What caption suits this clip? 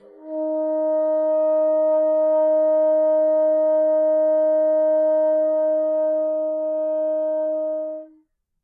One-shot from Versilian Studios Chamber Orchestra 2: Community Edition sampling project.
Instrument family: Woodwinds
Instrument: Bassoon
Articulation: sustain
Note: D#4
Midi note: 63
Midi velocity (center): 31
Microphone: 2x Rode NT1-A
Performer: P. Sauter